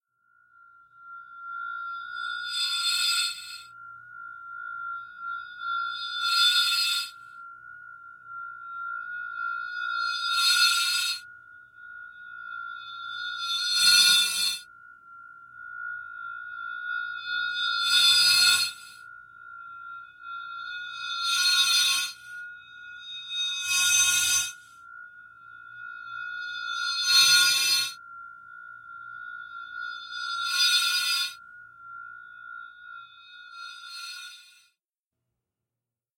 Background for a Teaser Trailer
This track is perfect for a film trailer which hits several "reveals" within it. It was originally recorded on a Zoom H2 of a glass bottle being hit while suspended in the air.
After some modifications, you have this track, ready for your next film trailer.
Like all my sounds, you don't need to mention me as the creator, but if you make a million from it, an open bar at any parties you hold would be nice.
glass-bottle, mysterious, fantasy, murder-mystery, trailer, music, high-pitched, scifi, thriller, ominous, trailer-music, sound-effect